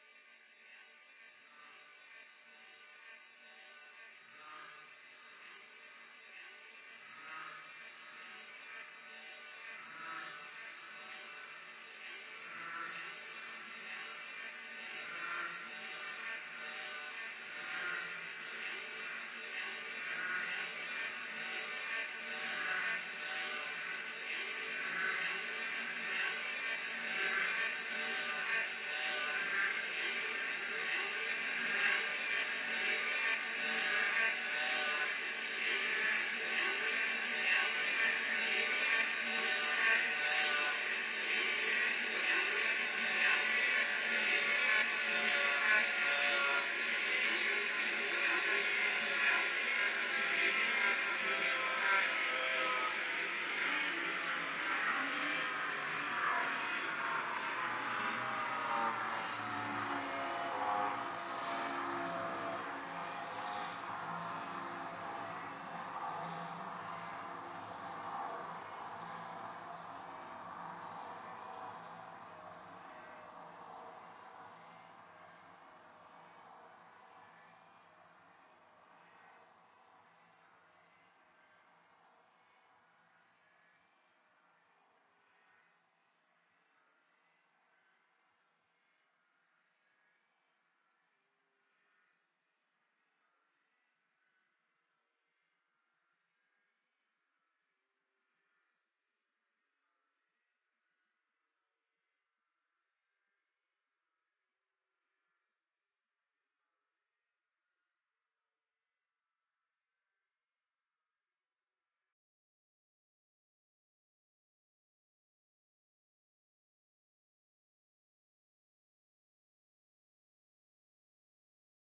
sample to the psychedelic and experimental music.
AmbientPsychedelic, ExperimentalDark, Noise